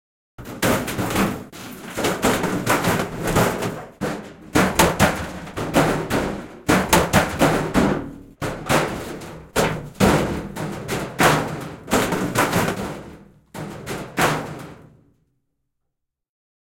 Metallinen kodinkone pyörii alas rappuja, metalli kolisee ja rämisee.
Paikka/Place: Suomi / Finland / Vihti
Aika/Date: 24.09.1993